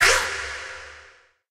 AI FX Wiwaaaak 4
A self-made jungle terror sound
Trap, Terror, Big, Wiwek, Jungle, Room